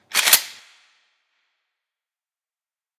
Rem870 Slide Forward 1
A Remington 870's pump being driven forward.
Gun, Shotgun, Shotgun-pump